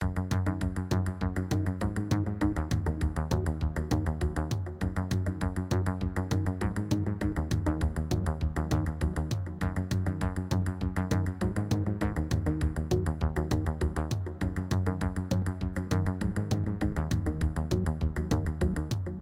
The Plan - Upbeat Loop - (No Voice Edit)
This is a shorter loopable version of my sound "The Plan - Upbeat Loop".
The voice has been removed.
It has better seamless looping than the original.
plan, fast, cinematic, joyful, instrumental